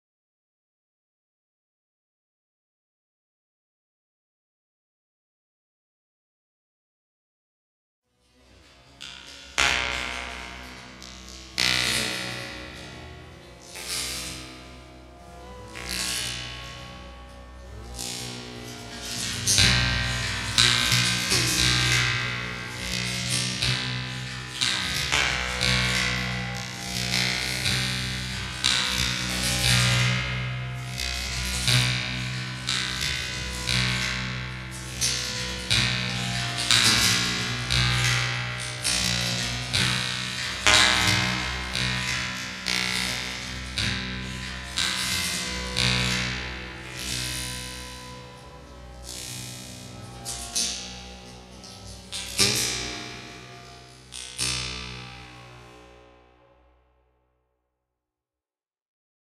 cauliflower sitar loop1
Cauliflower-samples were looped and passed through comb-filters in Max/MSP (see also Karplus-Strong), resulting in a sitar-like loop.
comb, loops, processed, sitar, vegetable